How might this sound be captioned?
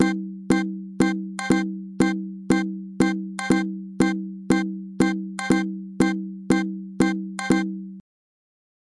sonido agudo de base
Recording, effects, media